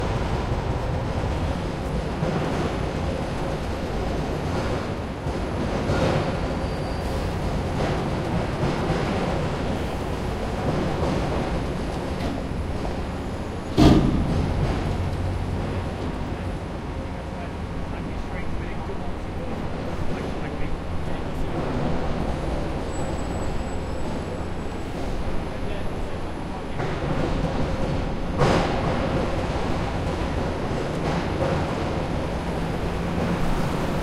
London Construction site ambience.
ambience, build, building, constructing, construction, drilling, hammering, work